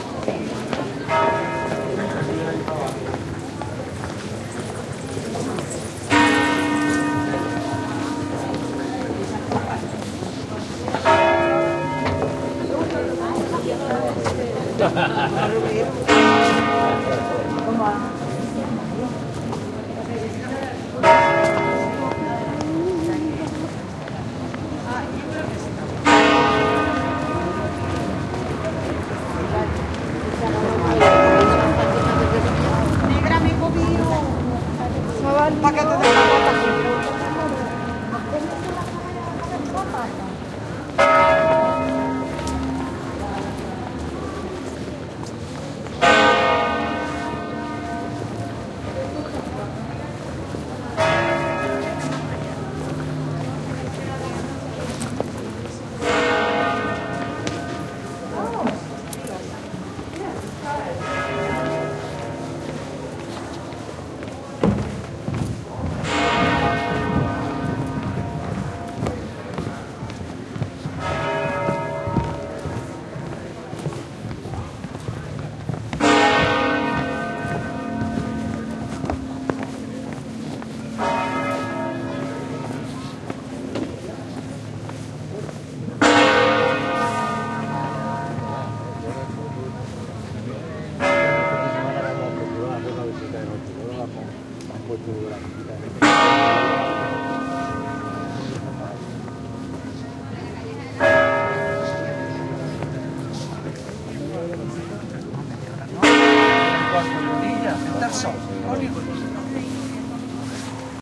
20110220 street.churchbell.02
people walking and talking, and slow pealing from church bells in background. Recorded in the surroundings of the Cordoba (S Spain) cathedral with PCM M10 recorder internal mics
bell, field-recording, pealing, street-noise, talk